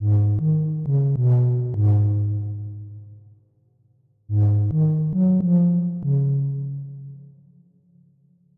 The MANDALORIAN - Woodwind Inspiration
Got some inspiration from THE MANDALORIAN iconic sound.
Made with a woodwind instrument.
KEY = F#
air, curious, harmonies, Mandalorian, meditative, smooth, sympathetic-tones, Wood, Wooden, Woodwind